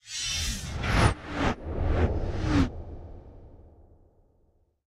Whoosh Synth Composite 05
sound horror with knife or katana
knife, horror, evil